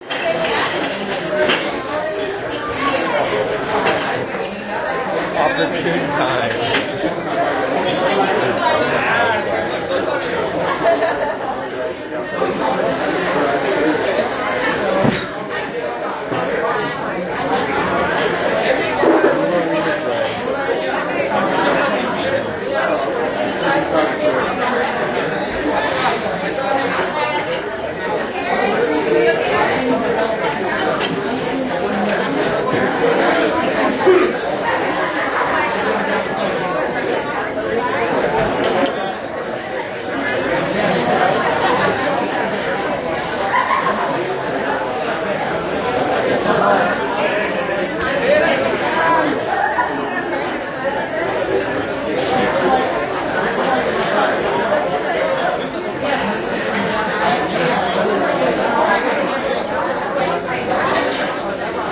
Bill's Cafe in San Jose, California during the busy Sunday brunch time slot.
Simply recorded with my Samsung S8 phone. I was mainly curious to see what the spectrum of frequencies looked like, because it was comically loud in there.
atmo, atmosphere, background-sound, ambiance, kitchen, restaurant, ambient, noise, atmos, soundscape, fx, atmospheric, background, cafe, amb